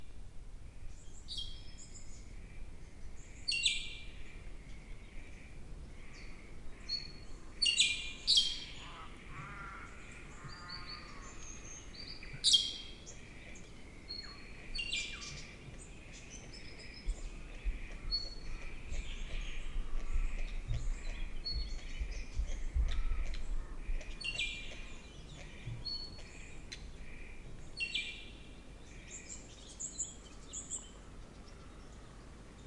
Bruny Island Ambience 2
Crescent Honey Eater (Egypt Bird).
Recorded 7.18 am, 31 July 2015, at Inala, Bruny Island, Tasmania, on a PMD 661 using a Rode NT55.
Bruny, Island, Tasmania, bird-song